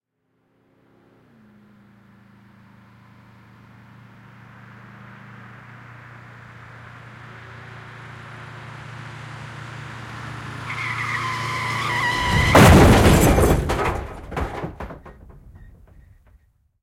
Kolari, autokolari / Car crash, head-on collision, cars approaching, brakes, crash, metal banging, glass breaking, mix
Nokkakolari. Henkilöautot lähestyvät, jarruttavat, törmäävät, kolari. Peltl kolisee, lasi rikkoutuu. Kooste.
Äänitetty / Rec: Kooste arkiston analogisilta nauhoilta / Mix based on archive's analogical tape material
Paikka/Place: Yle Finland / Tehostearkisto / Soundfx-archive
Aika/Date: 1993